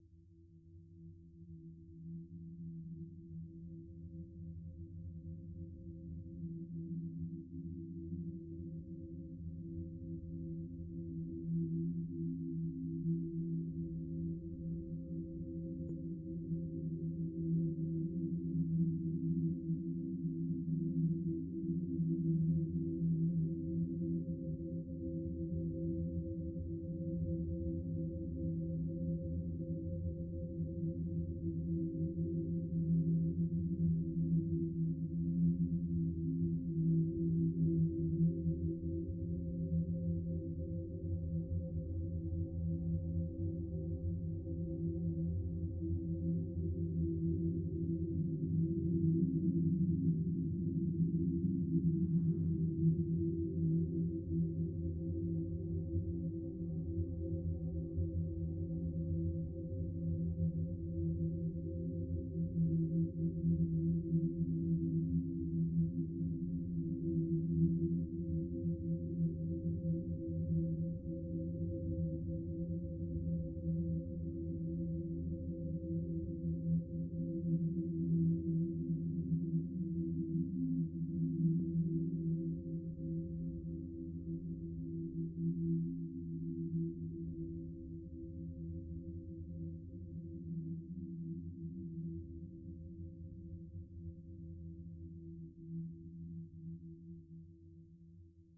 Atmospheric sound for any horror movie or soundtrack.